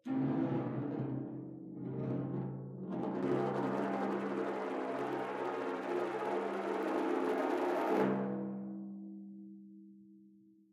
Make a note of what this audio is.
timp64 coinspin
spinning a coin (i think it was a penny) on the head of a timpano (kettle drum). the mic (marshall MXL 2003) is close, about 15 cm above the head... the coin rolls around the head a bit, then comes to rest under the mic (which took quite a few tries :> ) this is on the smaller of the two timpani (64 cm). unprocessed except for a little noise reduction.
timpani, flickr, coin, spin